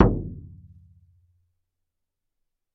Shaman Hand Frame Drum 15
Shaman Hand Frame Drum
Studio Recording
Rode NT1000
AKG C1000s
Clock Audio C 009E-RF Boundary Microphone
Reaper DAW
hand, percs, percussive, sticks